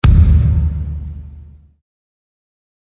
VSH-02-fist-slam-concrete wall-long
Concrete foley performed with hands. Part of my ‘various hits’ pack - foley on concrete, metal pipes, and plastic surfaced objects in a 10 story stairwell. Recorded on iPhone. Added fades, EQ’s and compression for easy integration.
concretewall, concrete, human, thump, crack, hits, concrete-wall, hand, smack, kick, hit, slap, pop, knuckle, fist, slam